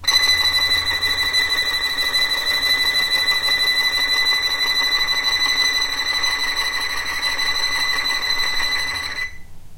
violin tremolo B5
violin, tremolo